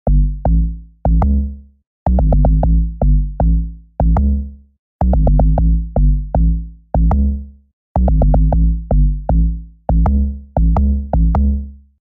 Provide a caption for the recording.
U Got Style Huh.
A New Style of bass